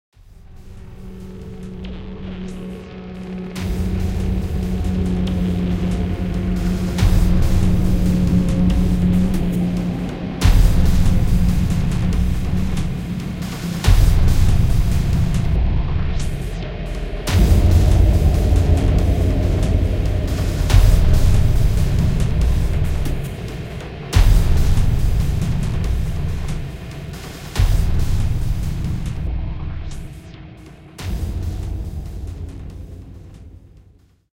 Horror Action 2
Action, Horror, Scary, Scream, Sub